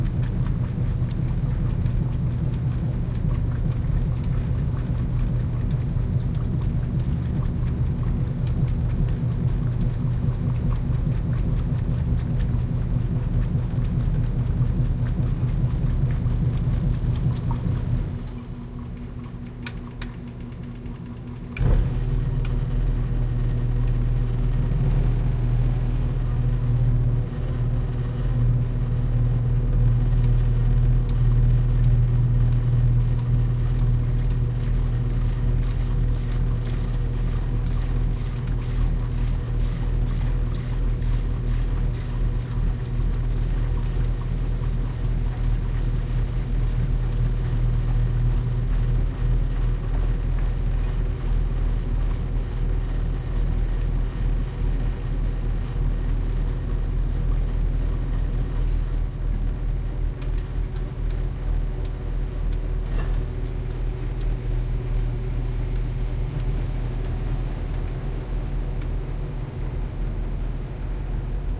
laundromat washers washing machines close wash4
laundromat, washers, close, wash, machines, washing